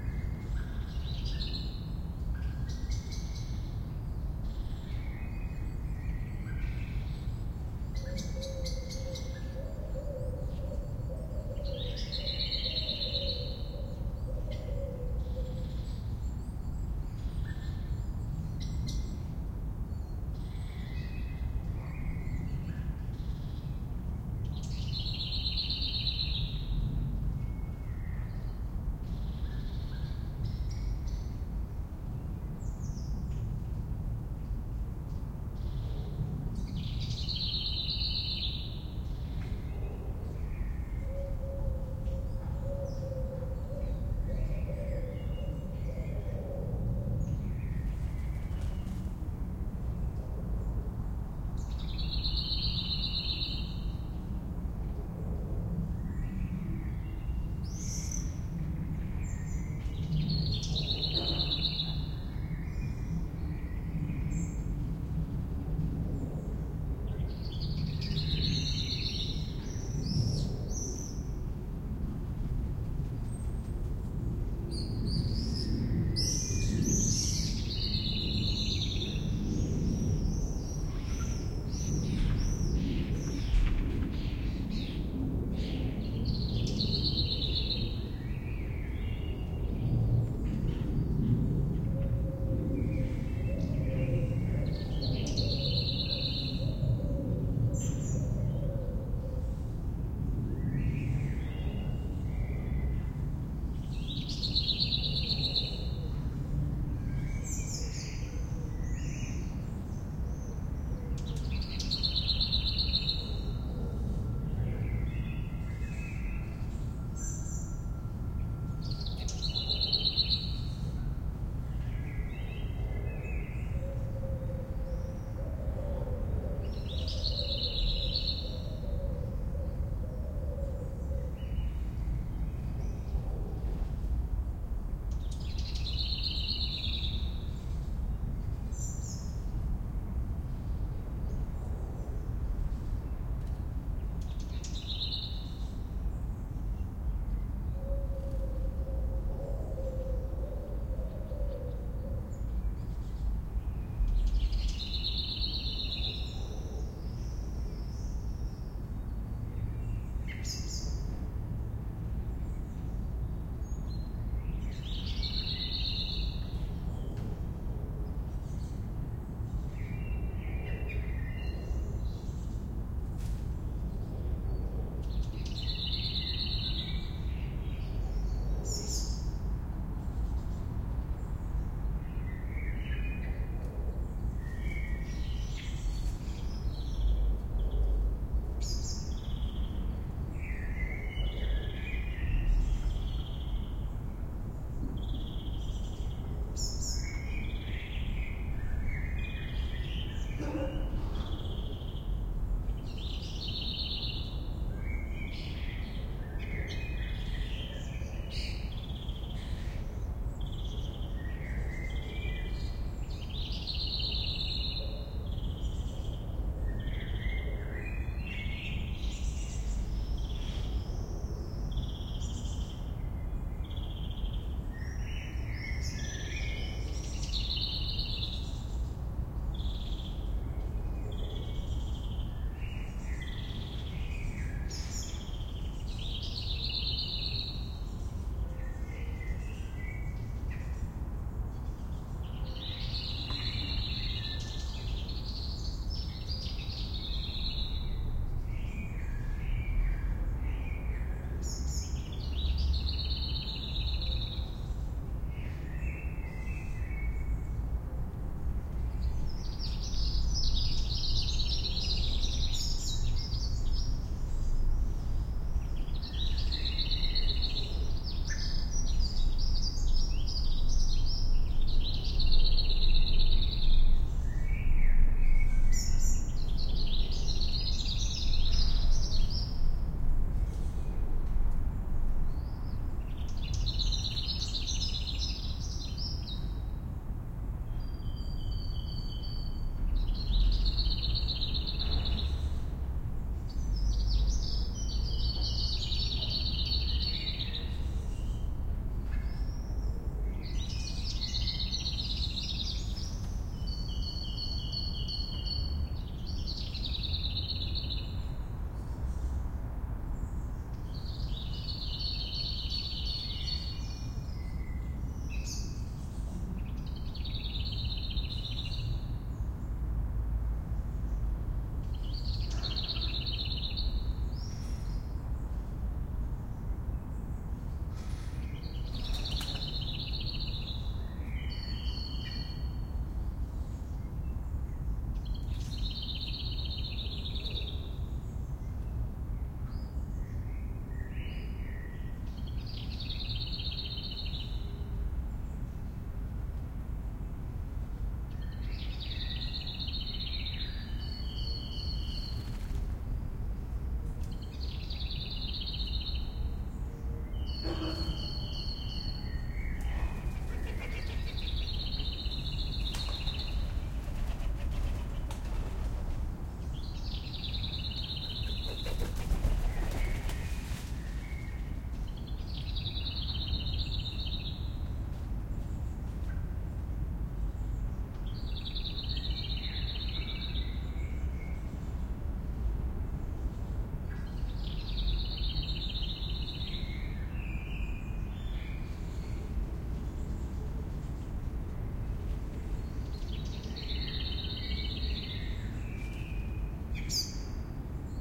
Pretty nondescript recording of a Black Redstart.
spring, blackstart, field-recording, bird